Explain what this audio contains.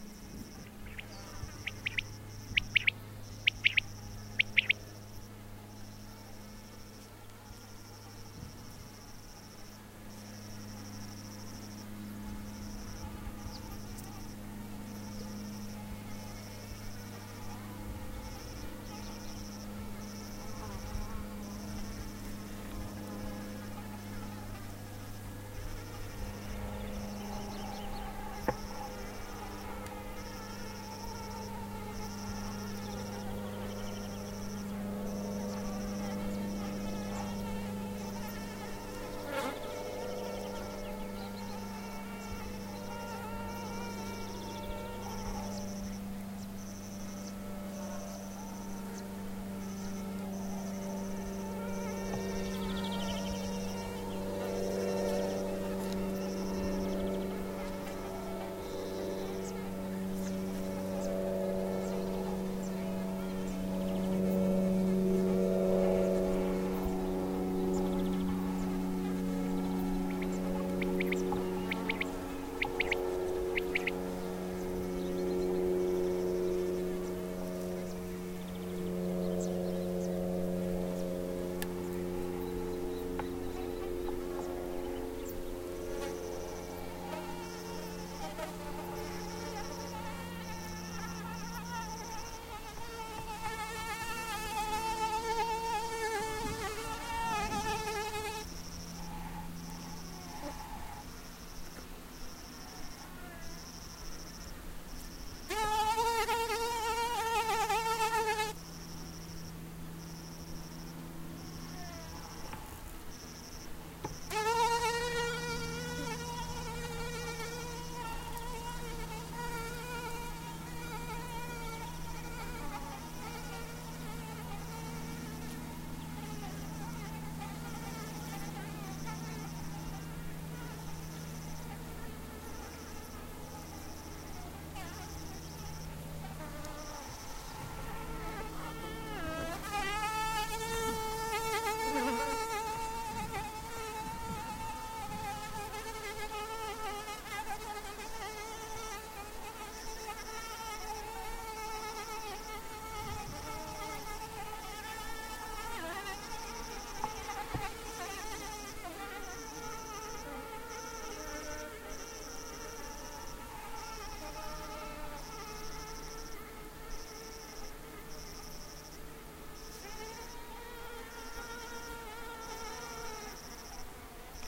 20060628.ambiance.scrub.elpeladillo01
summer early morning ambiance in Mediterranean scrub, including insects (sandwasps, cicadas), birds (quail), and an airplane passing. Sennheiser ME66 > Shure FP24 > iRiver H120 (rockbox) / ambiente en matorral mediterraneo por la mañana, con insectos (Bembix, chicharras), una codorniz, y una avioneta que pasa